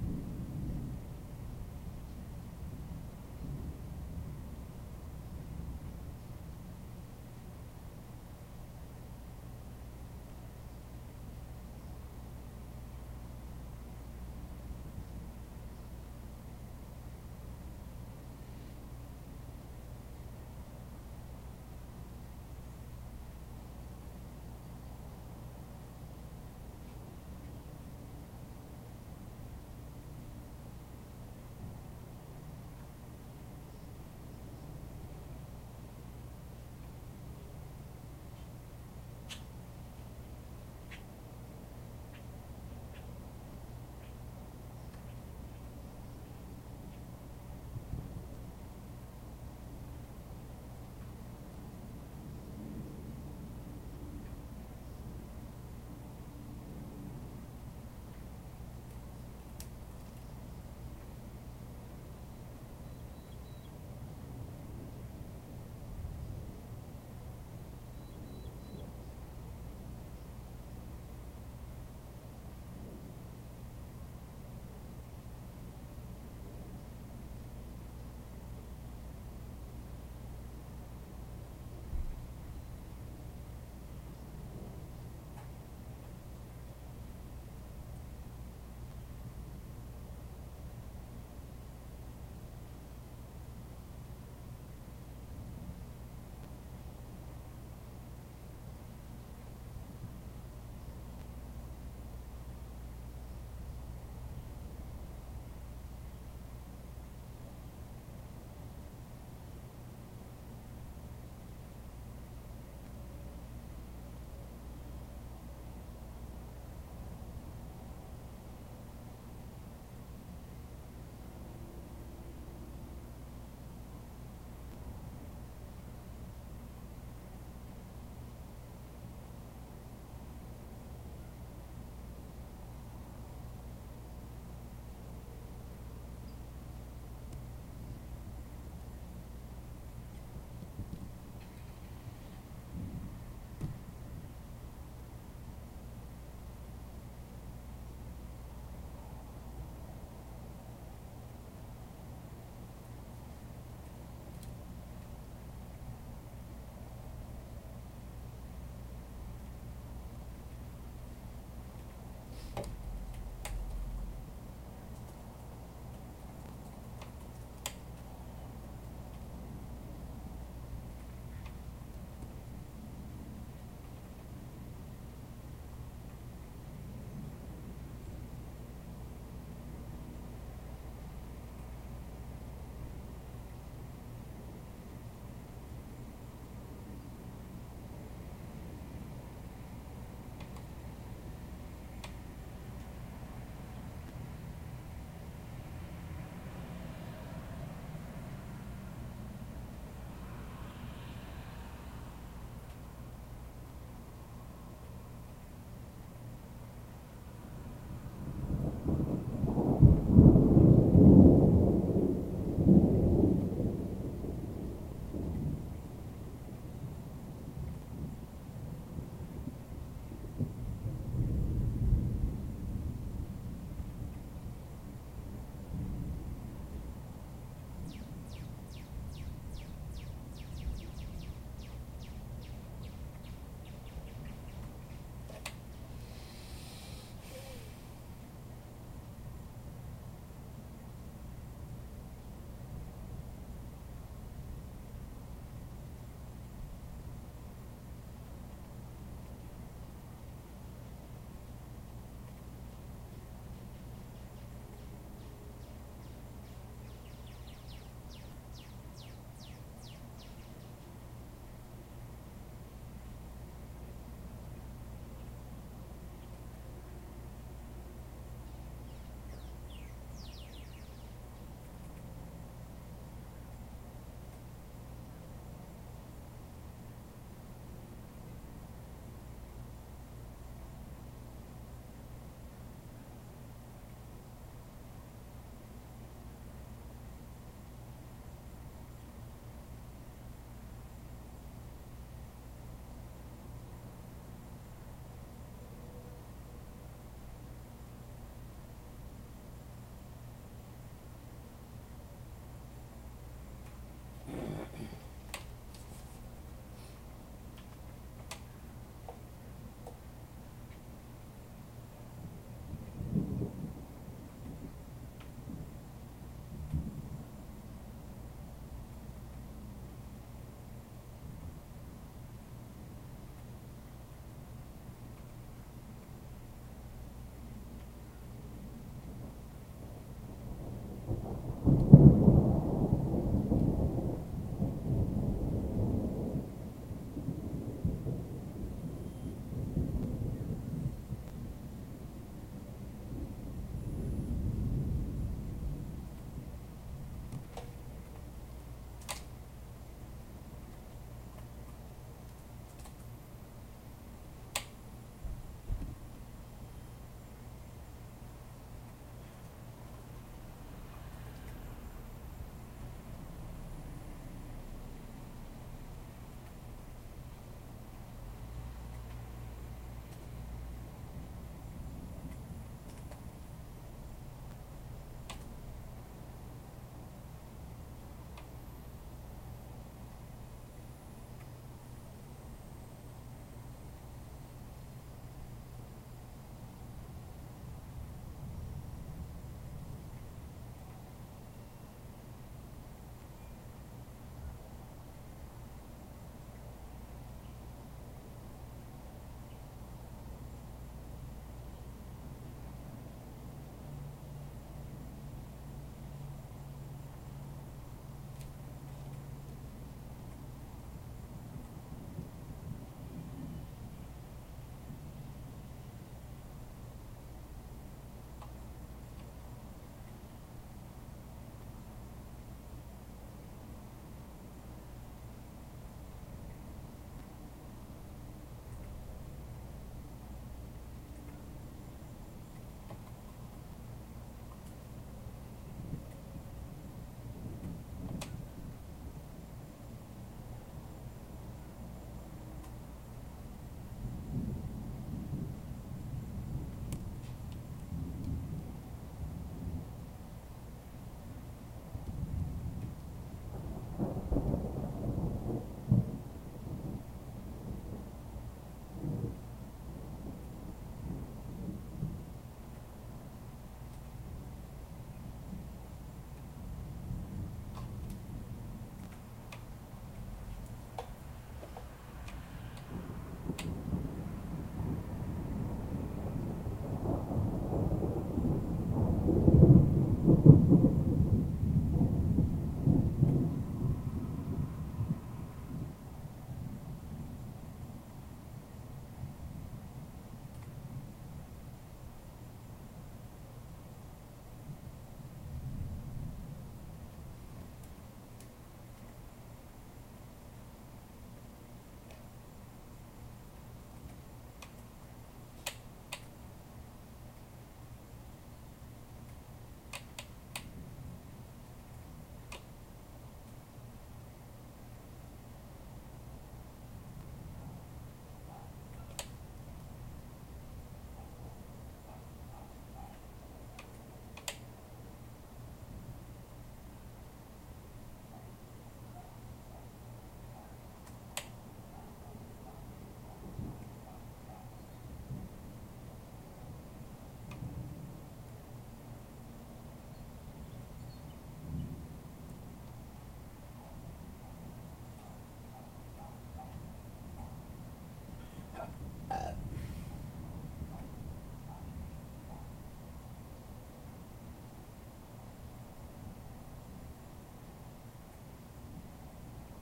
More much needed thunderstorms recorded with my laptop and a USB micriphone.
field-recording, rain, storm, thunder